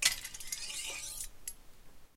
Swords Clash and Slide 1
Two swords collide and slide off of each other.
Clash, Hit, Knight, Medieval, Swing, Sword, Ting, Weapon